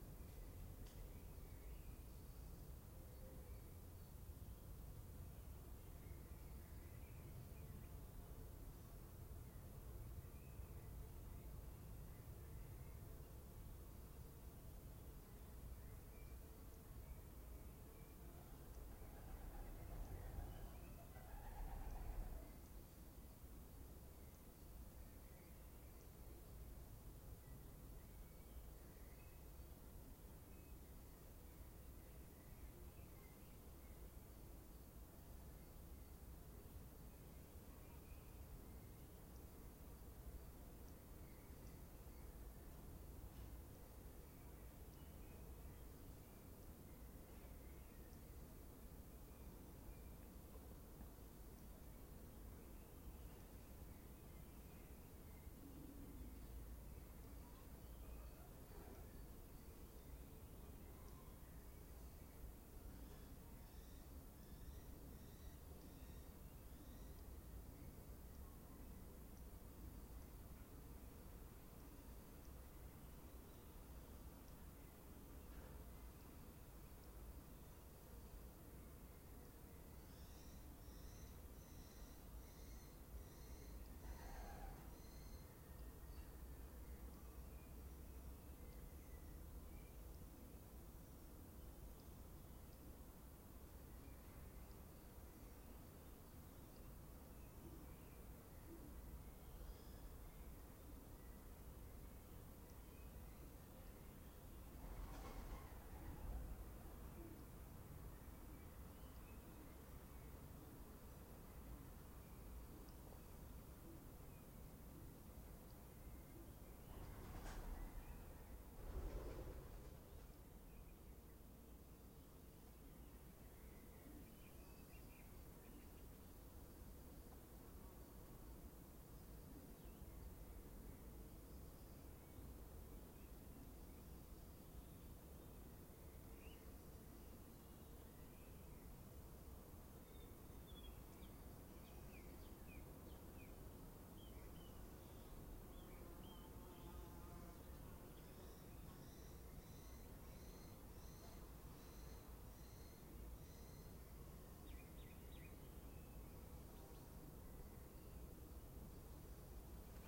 Monastery ruins 2(birds, pigeons, wings, wind)
Ambience of a ruined monastery. Lots of bird sounds.
wind, ruins, ambient